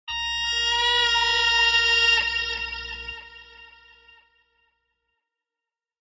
guitar swell 10
guitar with octafuzz, volume pedal, E-bow and other fx